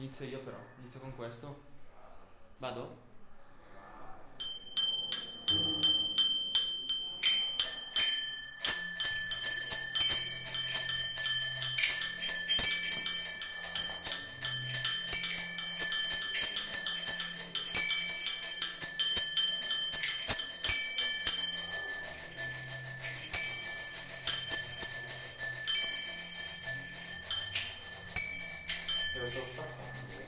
bell ensamble
Human Bike Sound Archive.
Bicycle bell performance by young musicians of the Temporary Black Space collective.
bike, pedal, bicycle